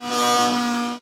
Sound of belnder recorded in studio.